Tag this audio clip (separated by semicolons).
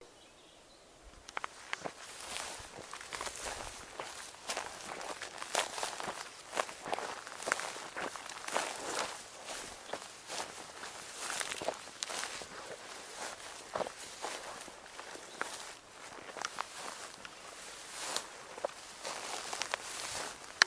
step; forest; walk; grass; steps; footstep; walking; footsteps; gravel